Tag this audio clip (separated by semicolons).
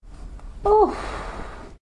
chill; relax; release; tension